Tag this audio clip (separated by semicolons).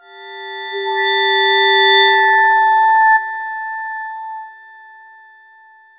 bell; experimental; multisample; reaktor; tubular